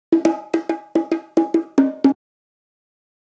JV bongo loops for ya 1!
Recorded with various dynamic mic (mostly 421 and sm58 with no head basket)
bongo, congatronics, loops, samples, tribal, Unorthodox